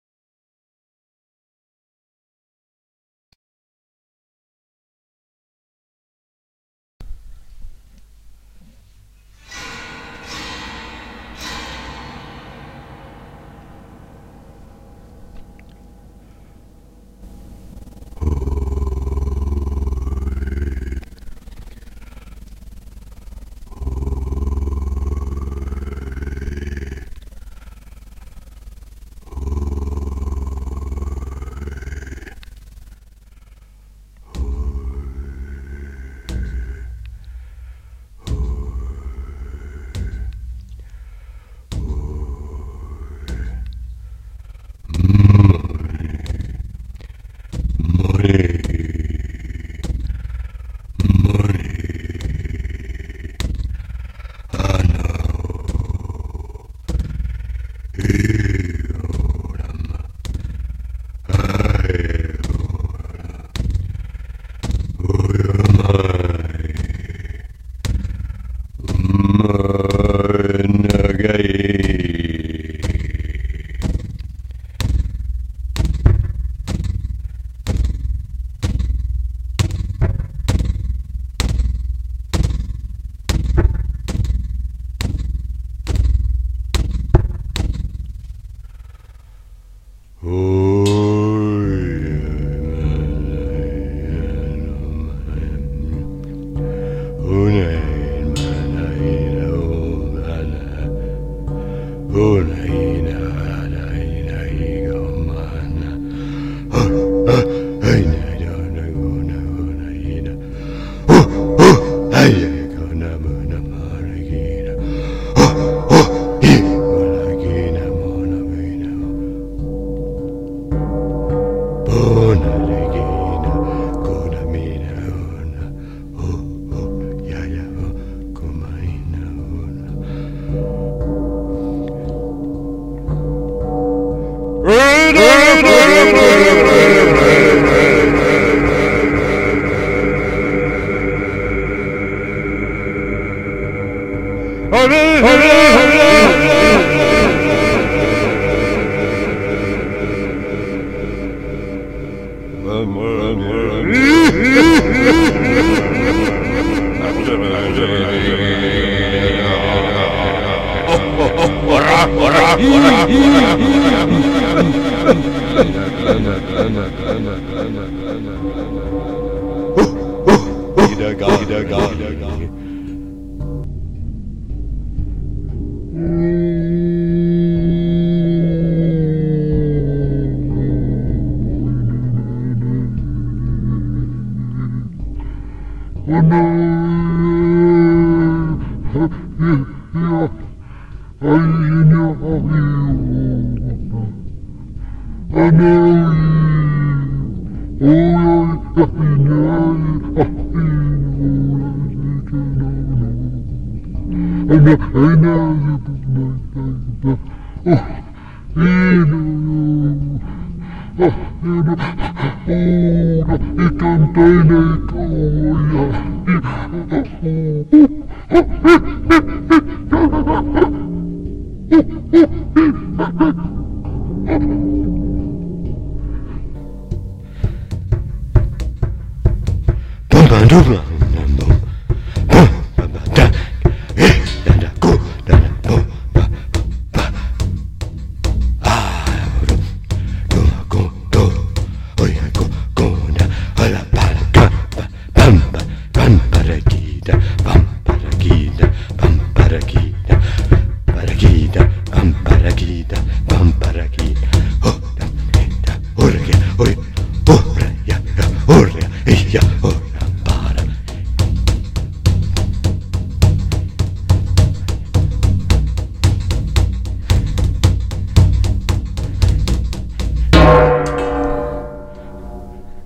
Scandinavian ancestors stole immense number of acres from Lakota tribes, which I did not know. Witkò Sung, kitipazuzu thangtanghang un ska menil.
history, Lakota, sad, tribes